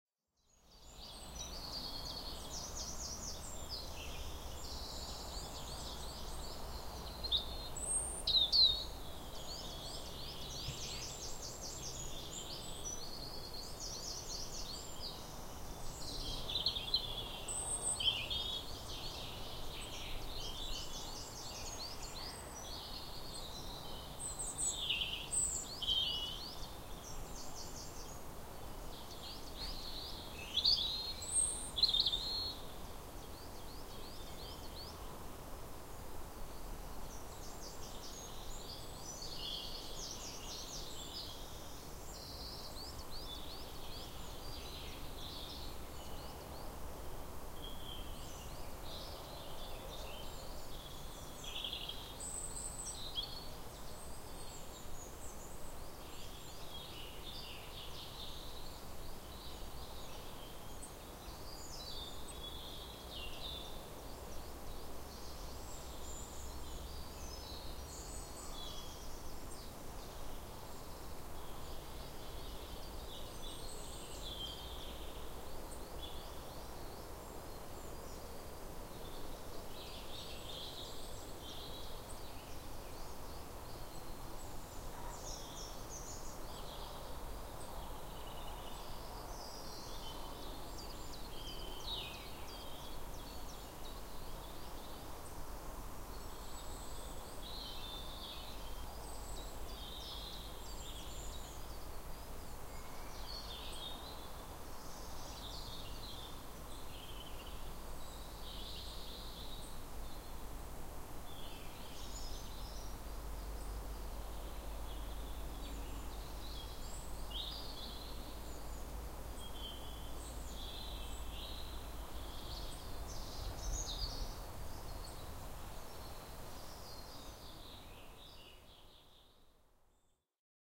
Sk310308 chiffchaff
A spring day in late March 2008 at Skipwith Common, Yorkshire, England. The sounds of many birds including a chaffinch and an early chiffchaff which can be heard from 1 minute 30 seconds. There are also general woodland sounds including a breeze in the trees and distant traffic.
ambience, bird, bird-song, chiffchaff, field-recording, woodland